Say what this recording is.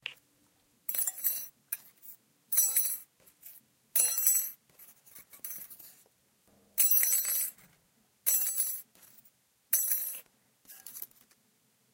Falling metal object
falling, jingling, belling